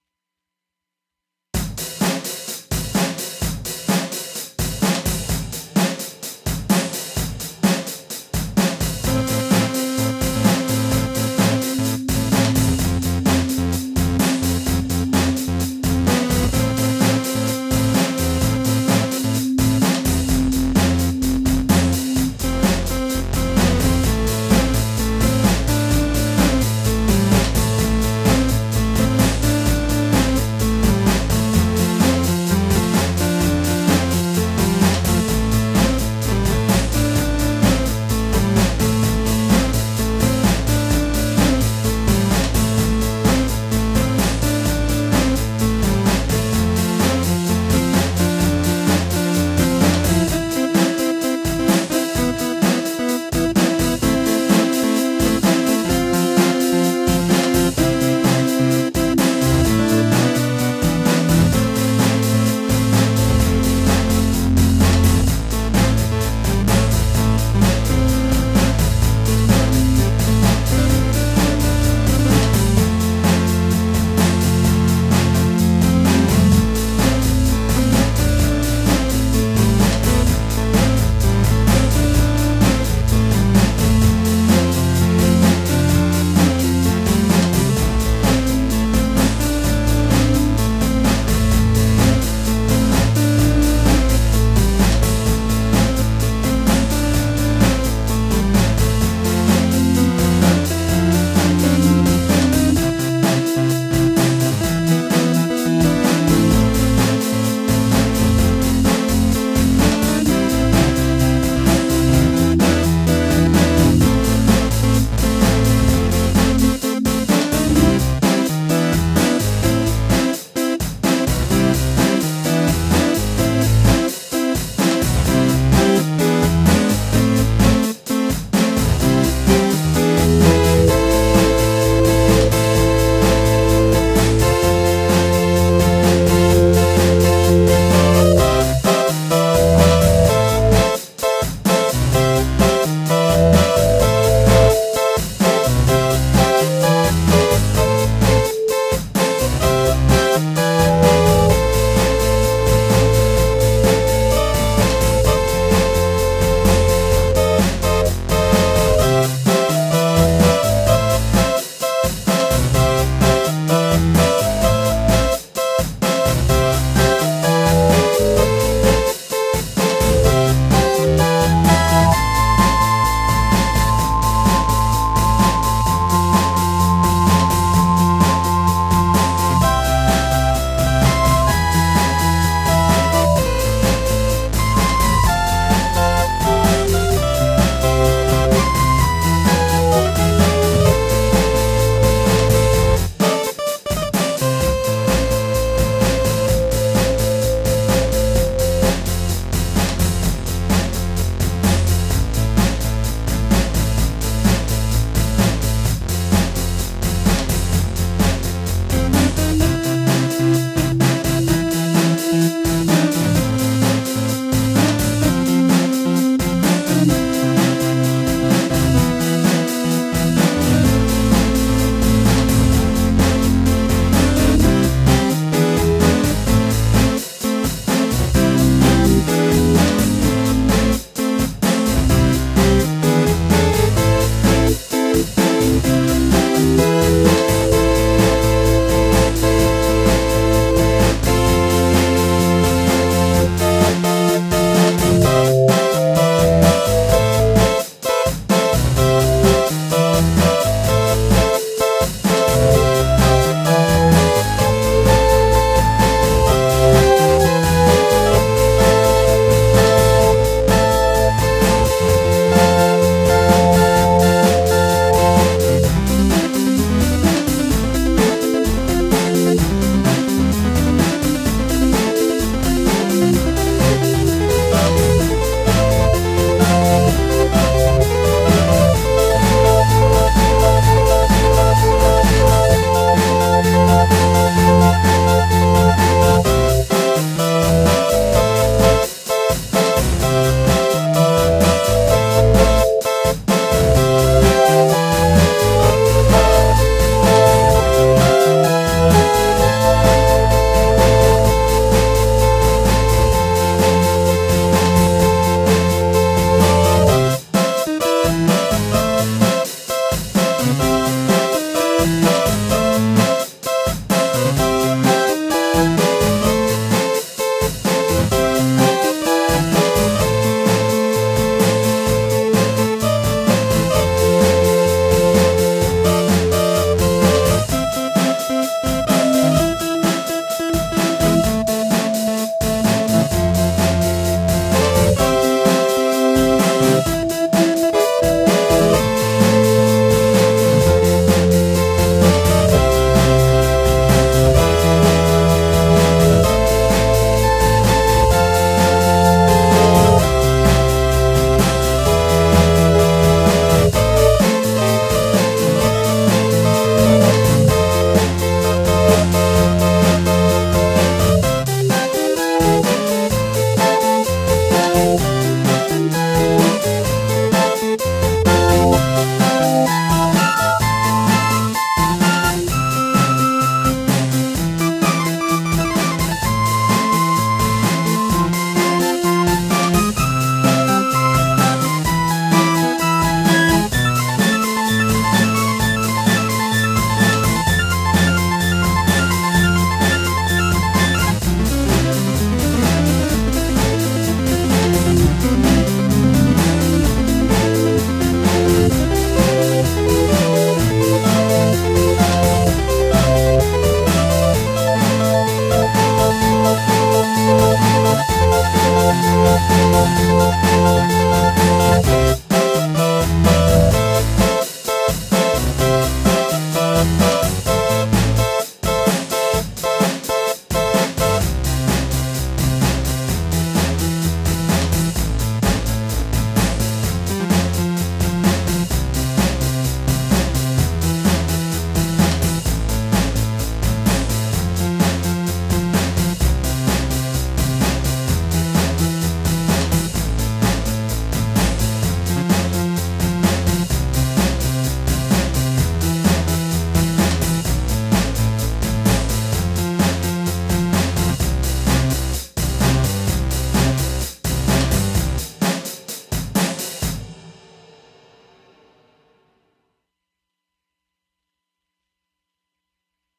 This sound was recorded December 11, 2013, using an Alesis QS8, a Roland 550W Keyboard Amp, the DM1 App for the iPad 2, an M-Audio PreAmp, and MultiTrack Recording Software: Sonar 6 Studio.